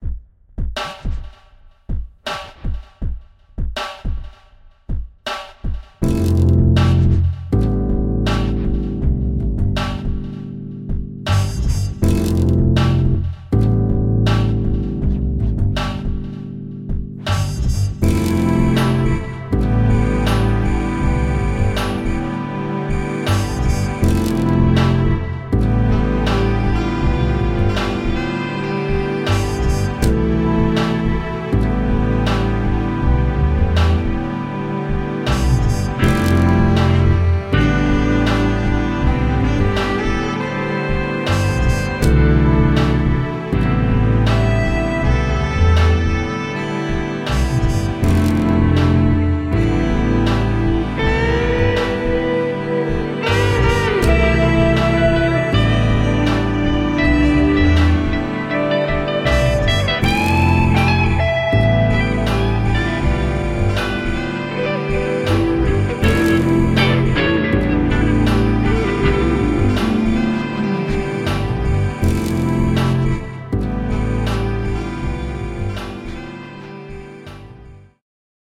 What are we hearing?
City Life Ambient
A trip hop type of urban sounding song with beat.